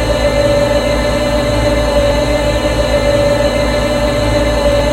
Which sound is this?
Created using spectral freezing max patch. Some may have pops and clicks or audible looping but shouldn't be hard to fix.
Atmospheric, Background, Everlasting, Freeze, Perpetual, Sound-Effect, Soundscape, Still